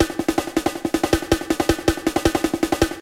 A slightly randomized set of snare hits gives you this splendid breakbeat fill.
programmed, drums, breakbeat